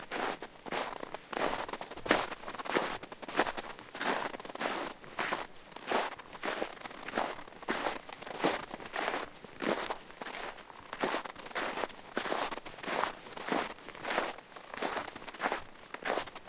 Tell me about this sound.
footsteps (snow) 02
walking in snow, can be looped
cold, footsteps-snow, loop, snow, walking-in-snow